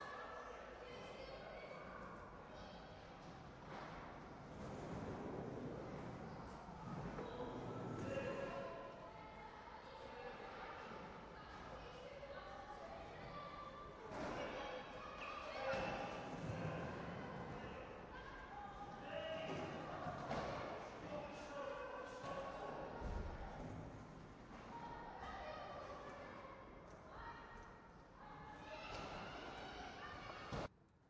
Kids at the Park
live, recording, samples, sampling